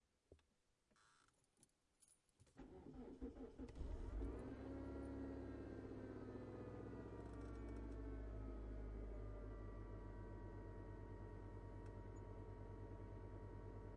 Starting the car. My Santa Fe 2004.
Recorded October 22, 2018
with Zoom H5
keys, starting, car, automobile, ignition